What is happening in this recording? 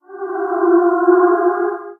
msft vs goog v2
Sonified stock prices of Microsoft competing with Google. Algorithmic composition / sound design sketch.
sonification, spectral